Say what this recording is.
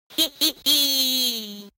Samples from a FreakenFurby, a circuit-bent Furby toy by Dave Barnes.